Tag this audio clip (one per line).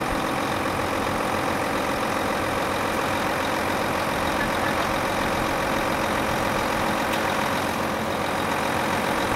dig
digging
fieldwork
gears
loader
lorry
sand
transport
transportation
truck
volvo